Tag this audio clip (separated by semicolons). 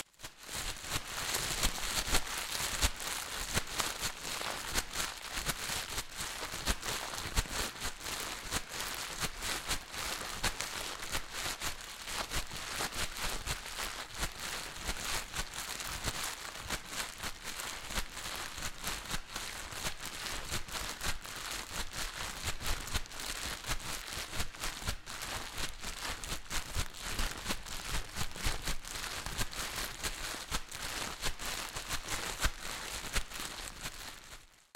natural; audio